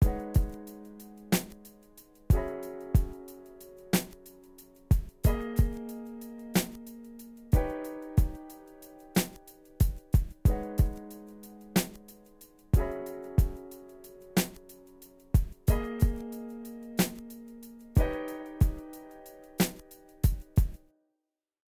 Old School Hip-Hop Lead Loop
background, background-music, filler, hip-hop, lead, loop, music, Old, old-school, rap, tik-tok, underscore, vlog